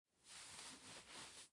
Clothes Rustling Movement 14 5
Acessories, Belt, Blanket, Body, Buckle, Cloth, Clothes, Clothing, Cotton, Design, Fabric, Foley, Handling, Leather, Movement, Natural, Nylon, Person, Recording, Running, Rustling, Shaking, Shirt, Shuffling, Soft, Sound, Sweater, Textiles, Trousers, Walking